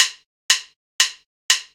Four-times drumstick intro
A drumstick intro formed by a four-time beat